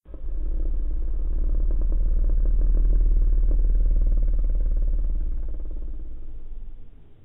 Monster Inhale
creature, fantasy